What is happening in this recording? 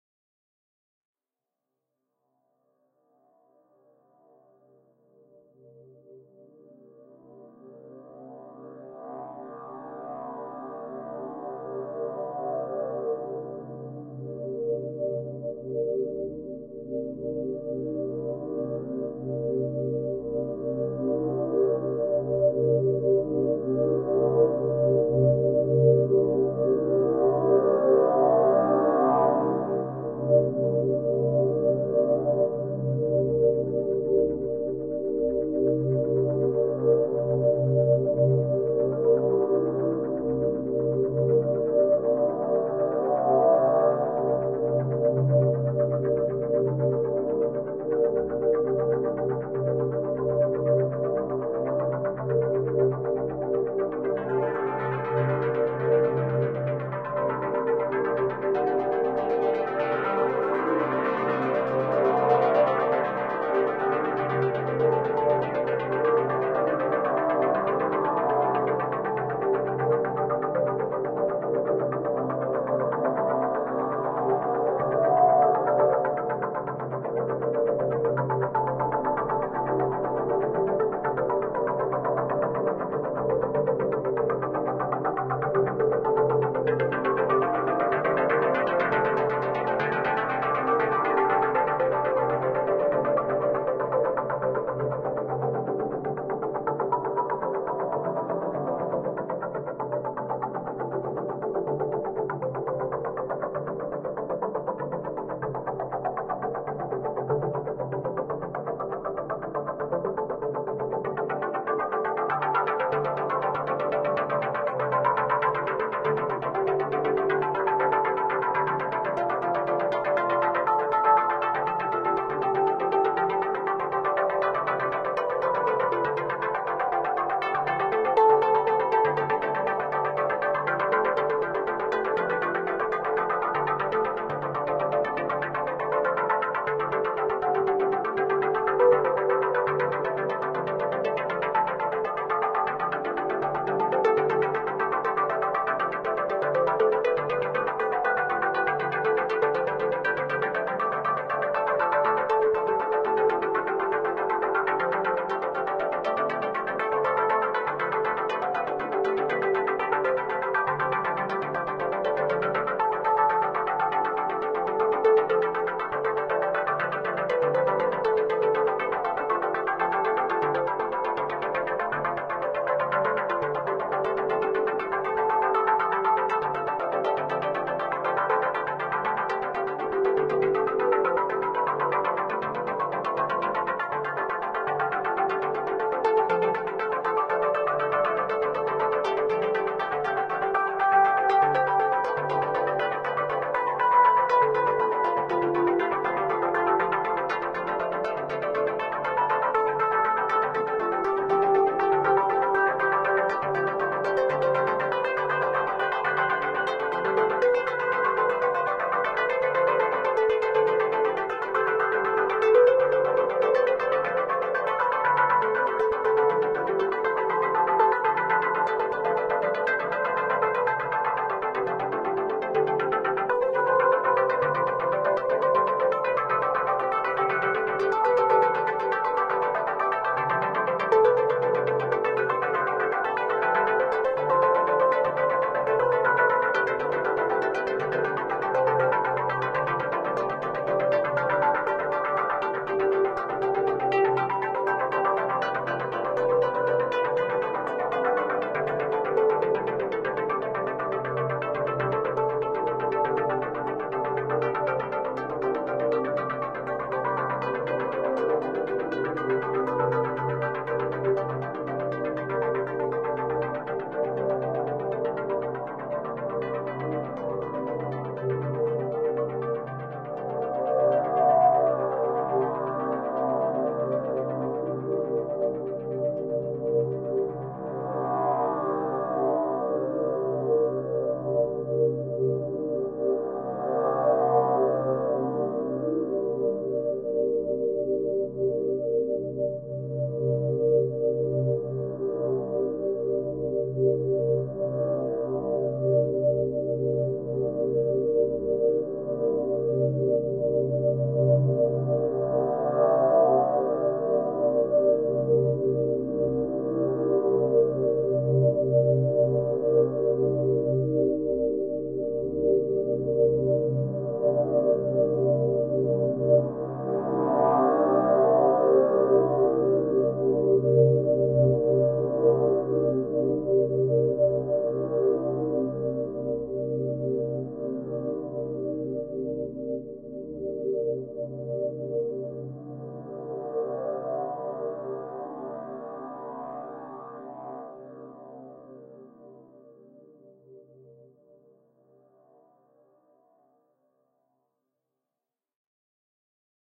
An ideal dark, spooky, athospheric backing track